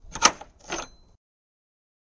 lock open
lock, unlock, unlocking